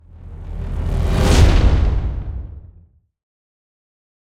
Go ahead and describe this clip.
Riser Hit sfx 041
Riser Hit effect,is perfect for cinematic uses,video games.
Effects recorded from the field.
Recording gear-Zoom h6 and Microphone - RØDE NTG5
REAPER DAW - audio processing
impact, industrial, gameplay, trailer, game, transition, epic, tension, reveal, hit, bass, sub, effect, stinger, cinematic, implosion, deep, sweep, explosion, logo, movement, indent, sound, video, thud, riser, boom, metal, whoosh